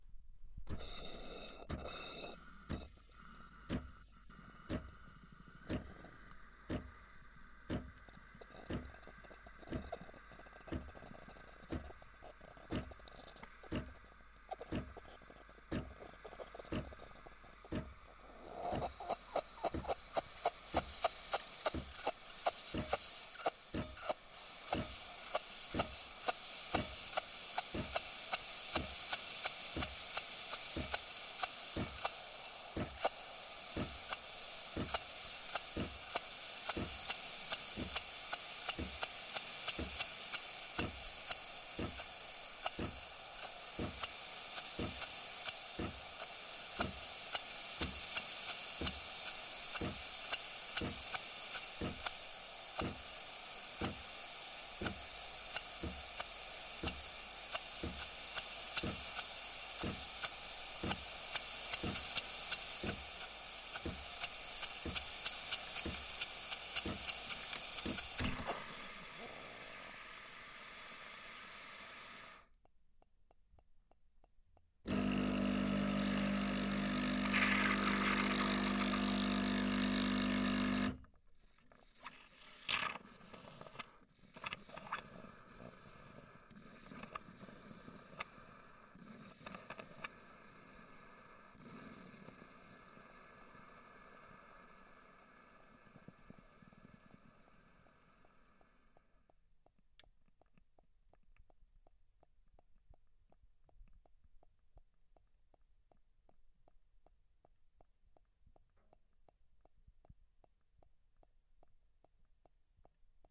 A coffee machine making steam for cappucino. There's steam hiss with water pump mechanical sound. Recorded with Shure SM58.